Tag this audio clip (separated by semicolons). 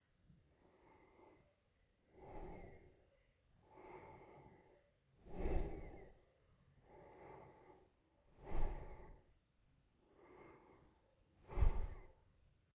heavy; breathing